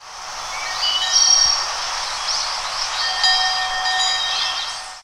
1 Athletic track
Sound of enviroment recorded with a tape recorder at a Barcelona Park.
park, athletic, bell, campus-upf, birds, UPF-CS14